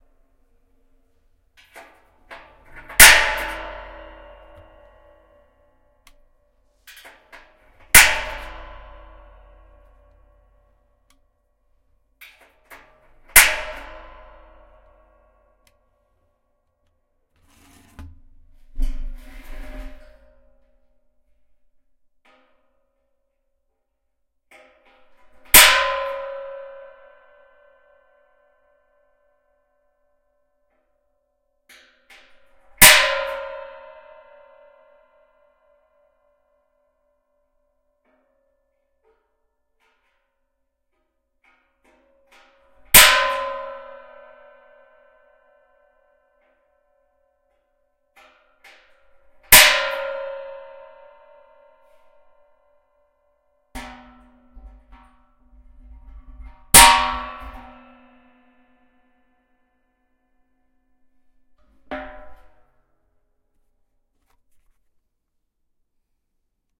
Loud metallic TING - Hitting metal barrel with prick punch
barrel-hit, metallic, ting-sound